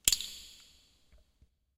DRUMS CASTANETS HIT
Single hit.
Reverb added.
castanets, drum, percussion